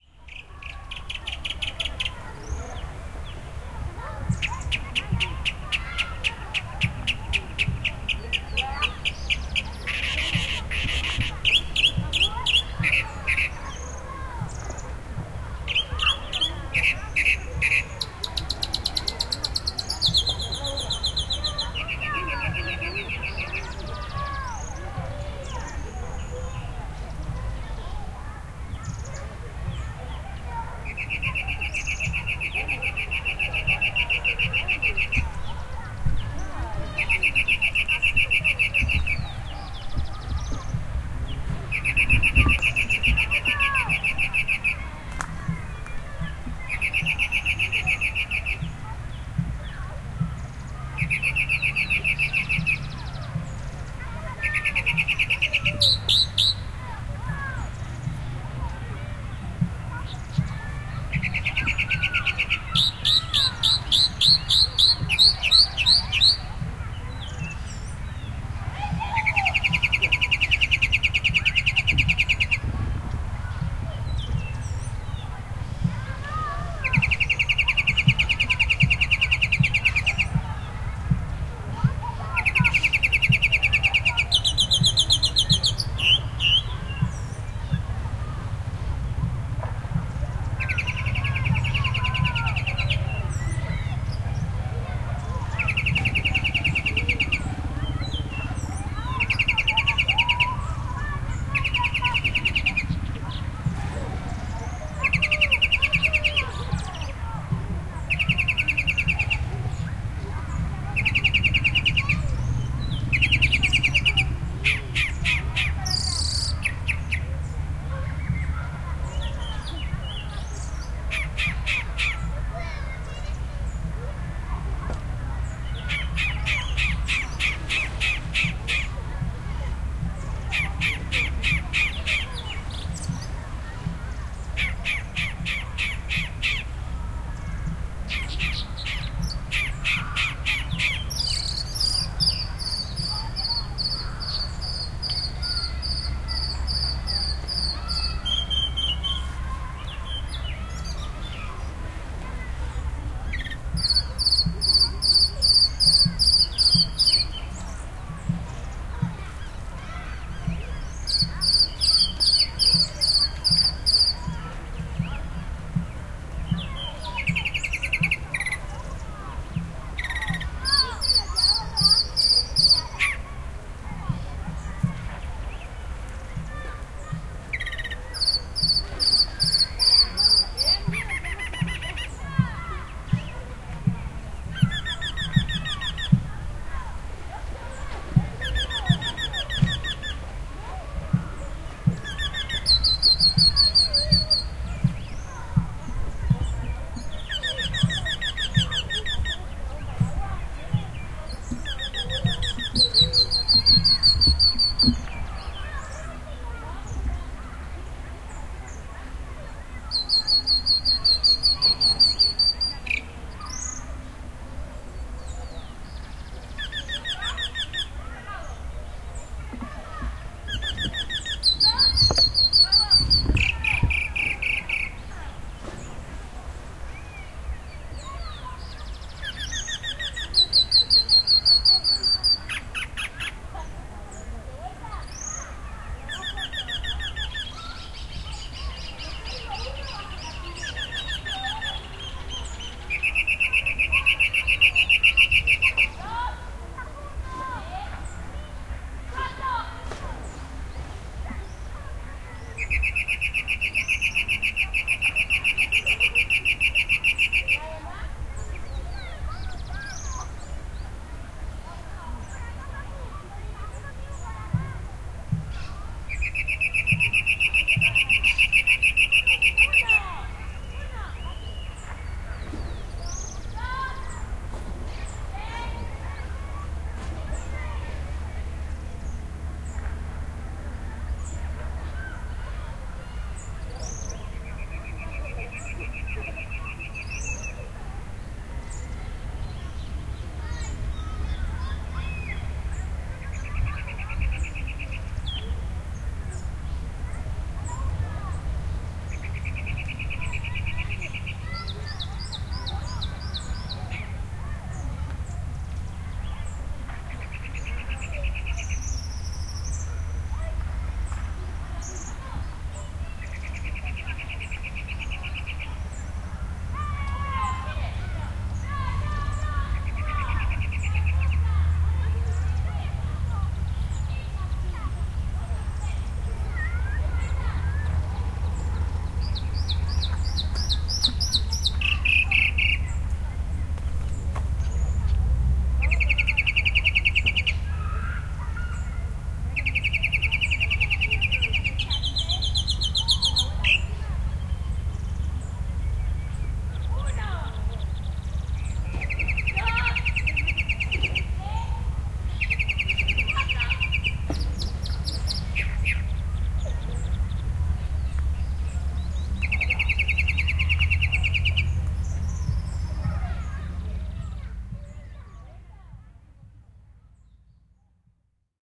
FR.BirdChatAmbience.28
It jumps...twirls...chats...jumps...
ambience, field-recording, kids, nature